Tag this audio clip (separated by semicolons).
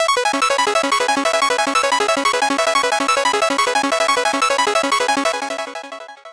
atmosphere; loop; drum; dance; beat; electro; effect; electronic; techno; trance; music; rave; bass; sound; club; house; layers